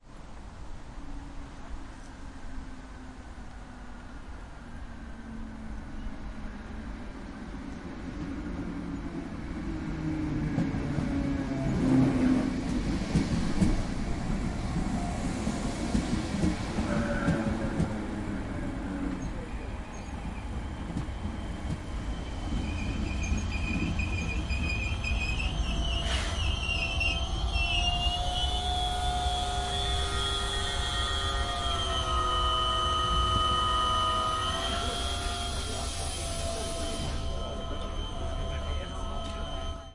DART train arrives at the platform.
Dublin 2018